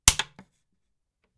Wood Snap 4
broken-bone, hurt, pain, sharp, snap, wood